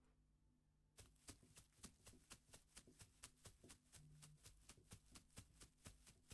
Steps of a Child in Grass
Just someone stepping on grass
Child, feet, foot, footstep, footsteps, grass, run, running, step, steps, walk, walking